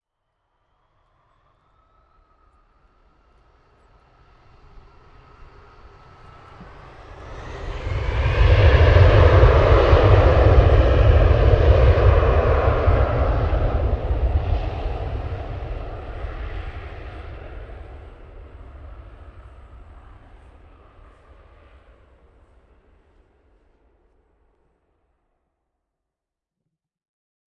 Jet Takeoff 1
Civil airliner taking off
aircraft, ambience, field-recording